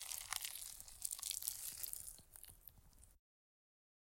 Squish from a pot of overcooked rice and a spoon.